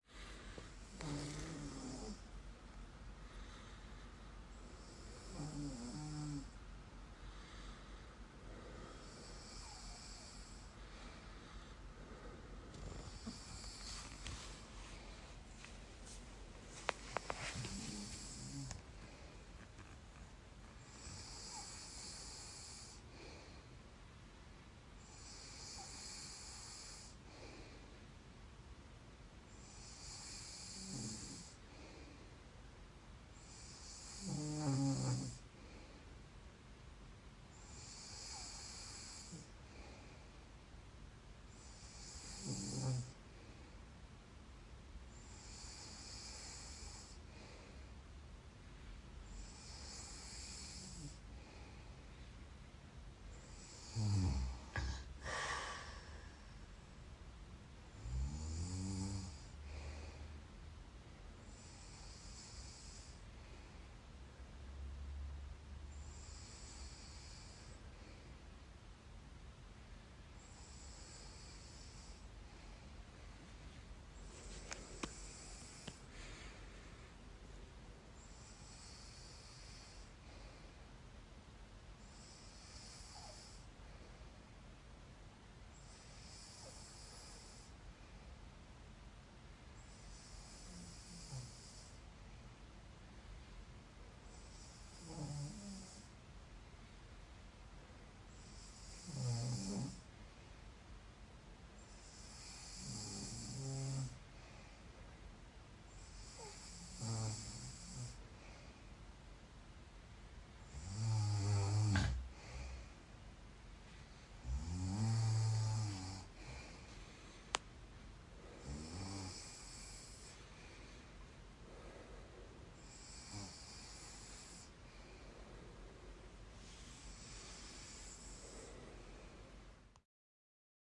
Sleeping with mild snoring
Gentle sleeping sounds, breathing and a mild snore.
bedroom, sleep, sleeping, snore, snoring